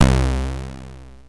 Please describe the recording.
One of many possible C-64 bass sounds. Programmed using GoatTracker, rendered using SIDPLAY2.
thanks for listening to this sound, number 201649
video-game, attack, chiptune, demo, pwm, c64, keygen, synth, sid, bass, chip, vgm, c-64